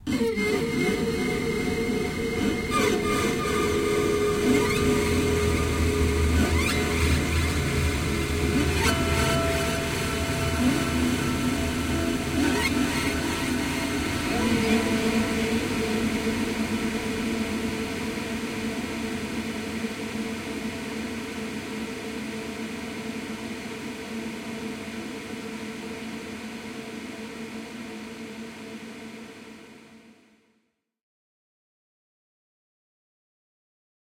Sonido con una alta reverberación que parece generar un ambiente tenso por los tonos disonantes que genera ademas de su reverberación larga
A sound with a lot of reverb and also a dissonant sound that withthe reverbration creates a big tension